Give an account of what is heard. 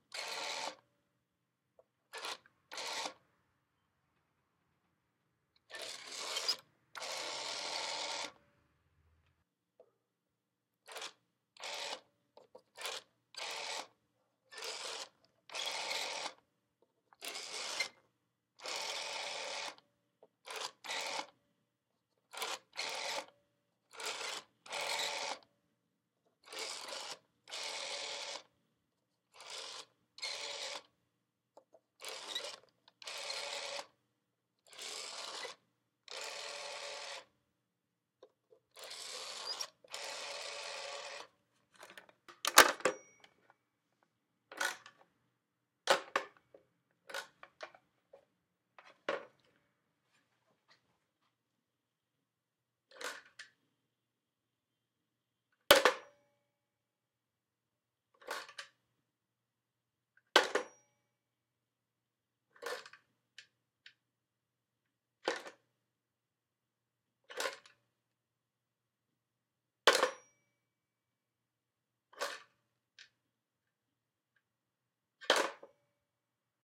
Dialing numbers on a rotary phone. Then, hanging and hanging up. You can hear the bell inside the phone when hanging.